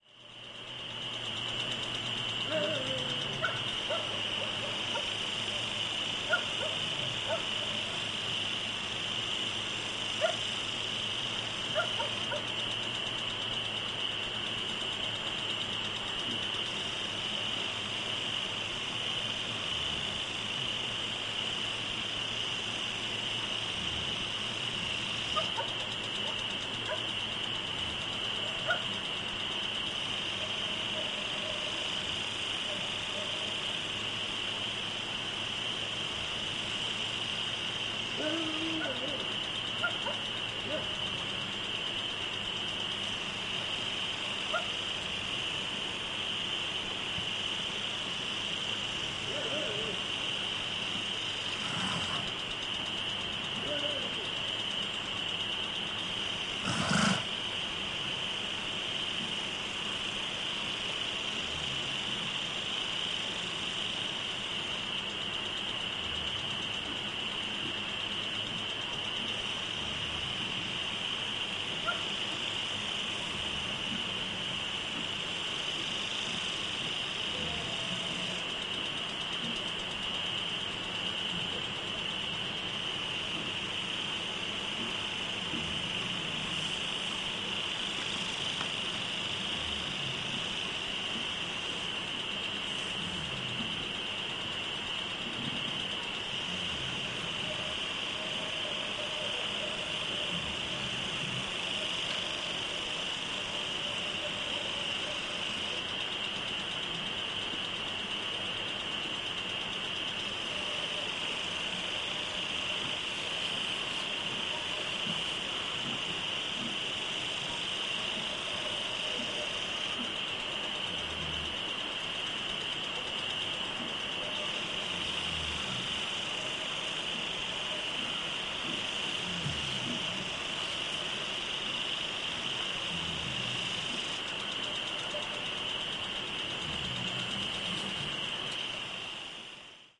20160717 night.lawn.59
Summer night ambiance, with crickets, distant dog barkings, close horse snorts (0:55) + noise of a lawn sprinkler. Recorded near Madrigal de la Vera (Cáceres Province, Spain) using Audiotechnica BP4025 > Shure FP24 preamp > Tascam DR-60D MkII recorder.
farm, nature, snort, dogs, rural, insects, ambiance, summer, horse, country, lawn, night, crickets, sprinkler, field-recording, barkings